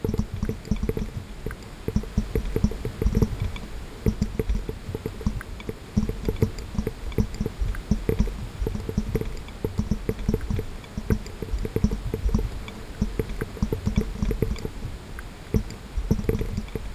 Droplets falling on a water surface, recorded with a GoPro Hero dipped underwater in a washtub, some background noise but I hope some find it useful.